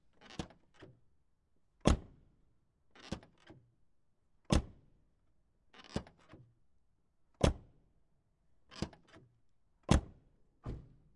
Opening and closing the door of an Opel Astra 1.6_16V.
Also available under terms of GPLv2, v3 or later.